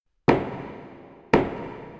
The sound coming from knocking on a dungeon door.